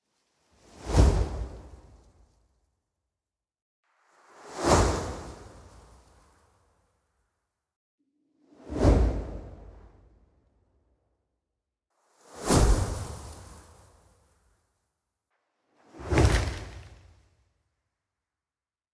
Magic Whoosh ( Air, Fire, Earth )
fireball, flyby, game-sound, magic, magical, magician, rpg, spell, swoosh, whoosh, wizard